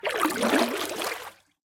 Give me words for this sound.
Water Paddle med 006
Part of a collection of sounds of paddle strokes in the water, a series ranging from soft to heavy.
Recorded with a Zoom h4 in Okanagan, BC.
boat, field-recording, lake, paddle, river, splash, water, zoomh4